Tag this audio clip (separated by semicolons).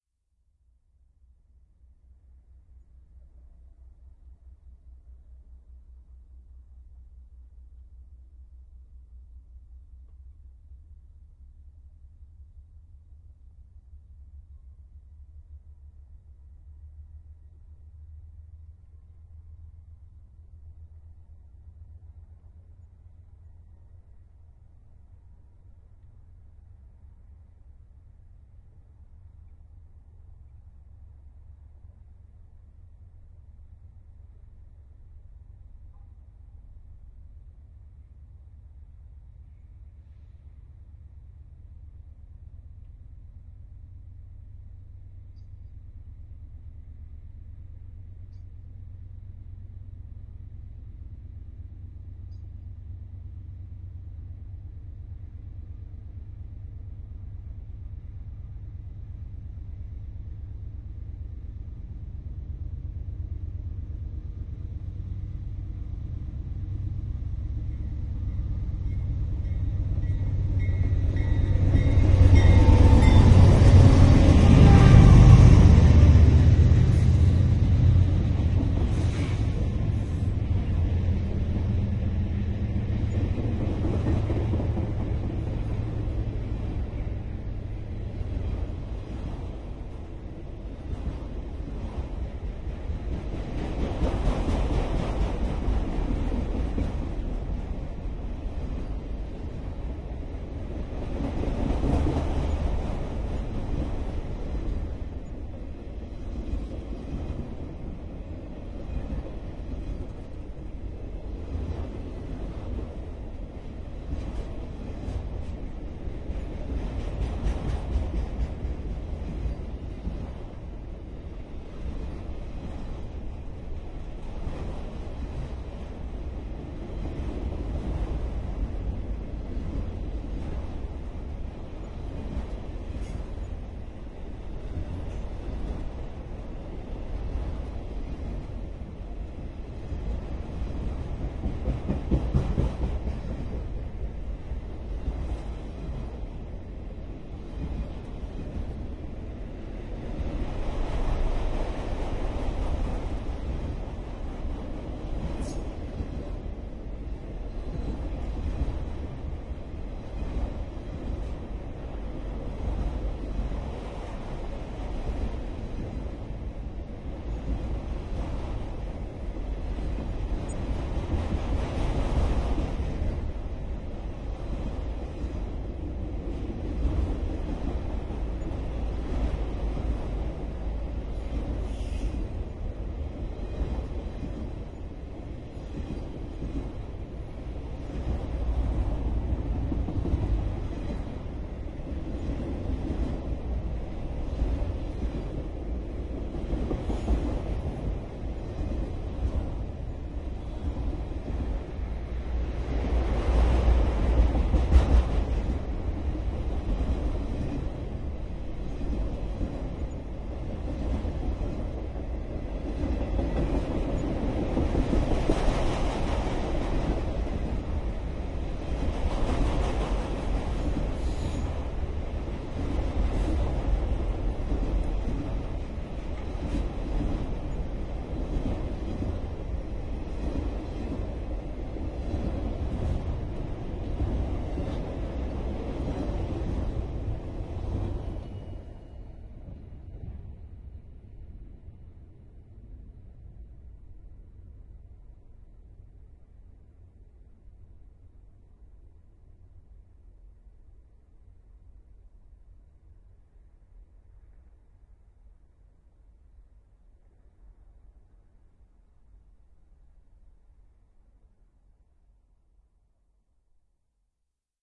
railroad; roland; bell; horn; purcell; station; crickets; naiant; R-26; locomotive; railway; train; BNSF; bridge; Disk; oklahoma; cars; jecklin; birds; freight